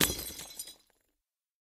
LIGHTBULB SMASH 001
This was the smashing of an indoor flood light bulb. Lights smashed by Lloyd Jackson, recorded by Brady Leduc at Pulsworks Audio Arts. Recorded with an ATM250 mic through an NPNG preamp and an Amek Einstein console into pro tools.
crash, crunch, crush